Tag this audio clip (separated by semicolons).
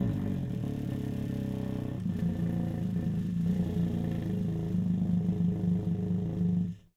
davood; trumpet